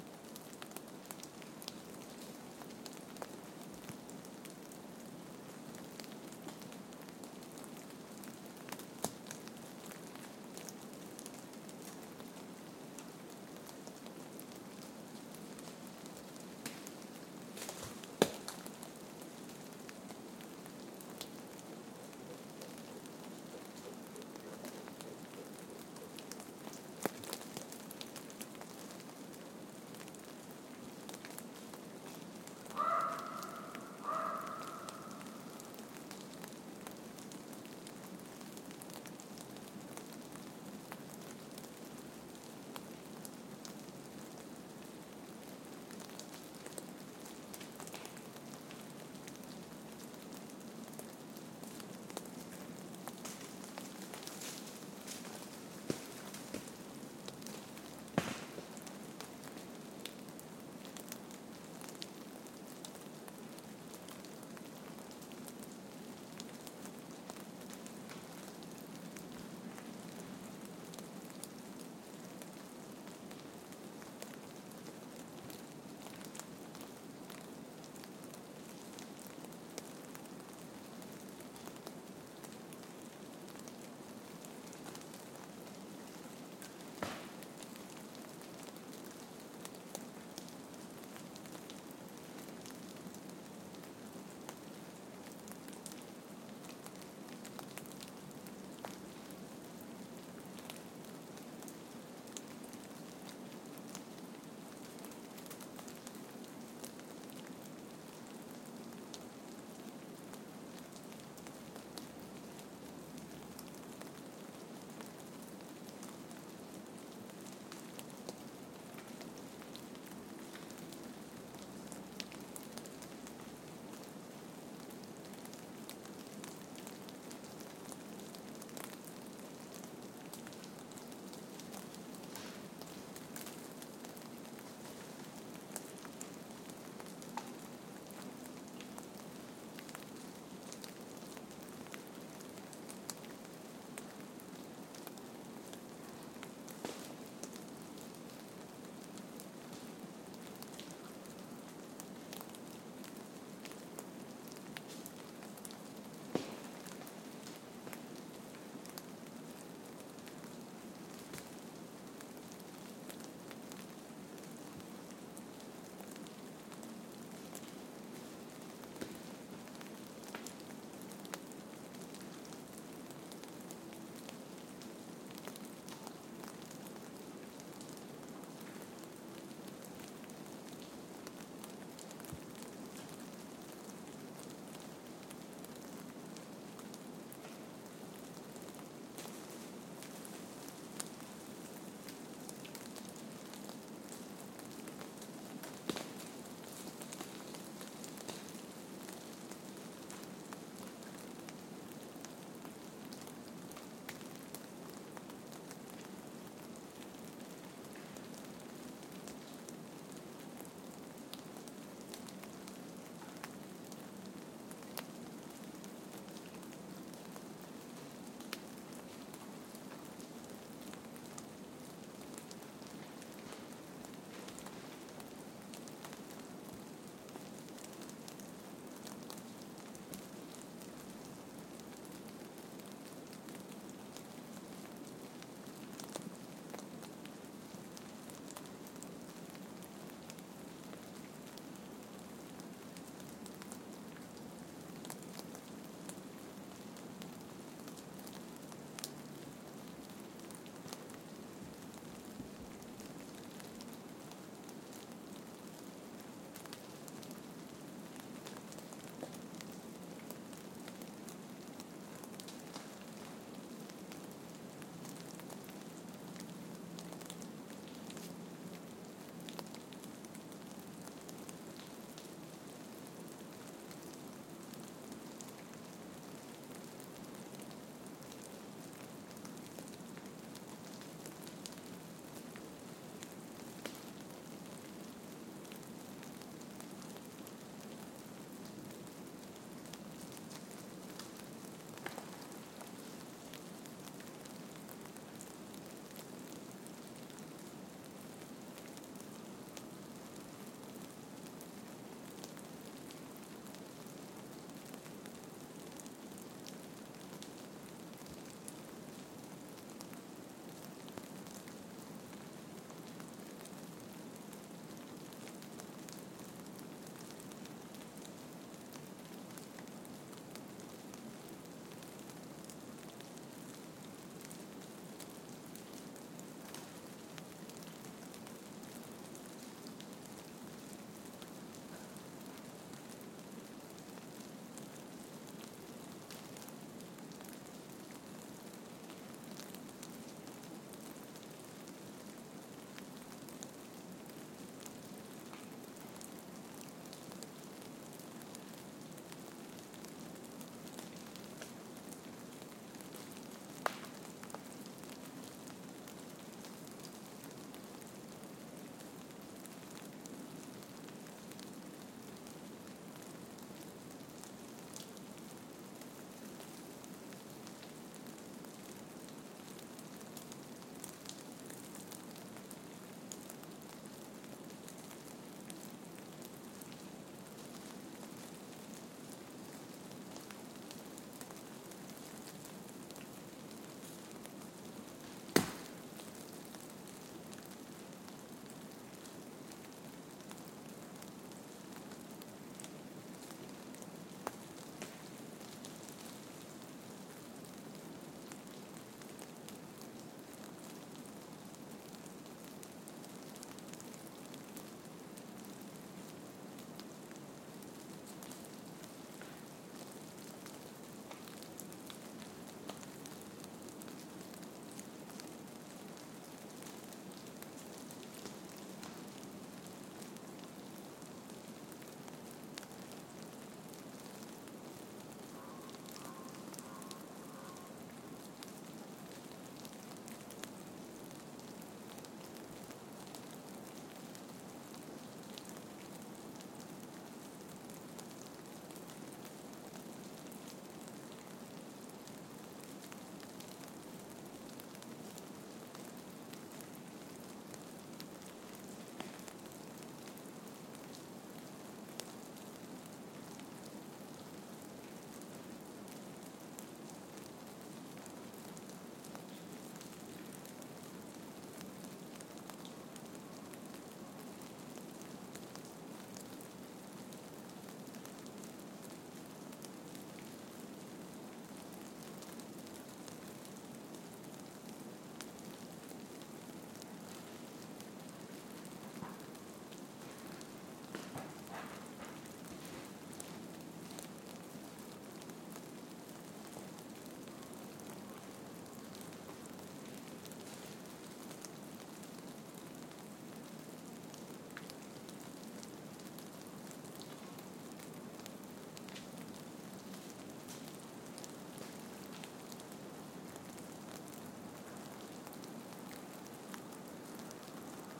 icy snow in a forest
A mono recording of snow falling from trees onto snowy and ice ground. The larger thumps are large piles of snow falling off of the trees.
Recorded with an AT4021 mic into a modified Marantz PMD661.
snow, snowfall, ice, relaxing, ambient, outside, atmosphere, mono, nature, field-recording